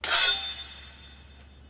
Fifth recording of sword in large enclosed space slicing through body or against another metal weapon.